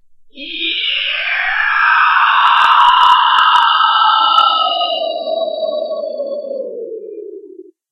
me screaming into my mic, very creepy. not tampered with at all.
ghost, horror, scary, death